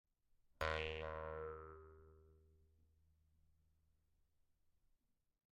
Jaws Harp- Hello
A recording of a jaws harp, whilst the player says hello. Recorded with a behringer C2 pencil condenser into an m-audio projectmix i/o interface. Very little processing, just topped and tailed.
Alien, hello, robotic, silly